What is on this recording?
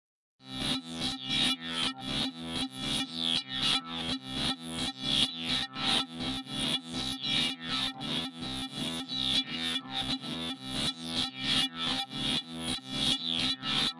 treated synthesiser riff modulation